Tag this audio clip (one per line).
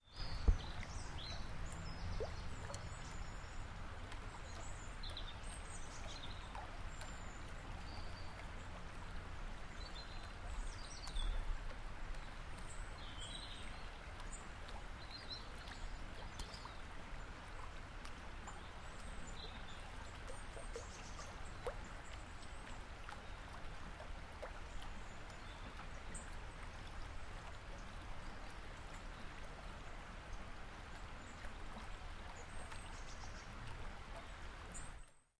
small
stream
woods